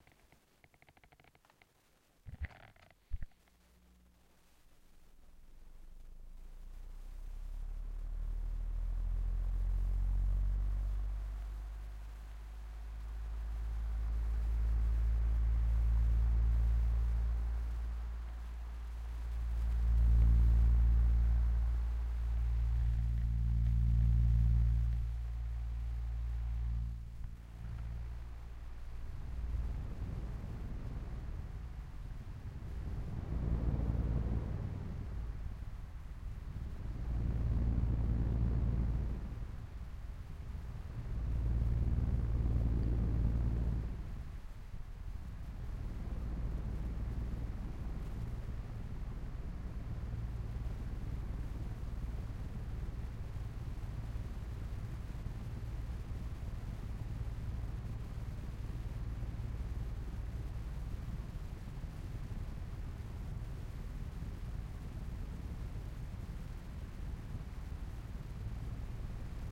Fan Buzz
Sitting right next to a fan, the buzz.
fan-buzz, rattle